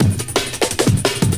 a drumloop I created in ft2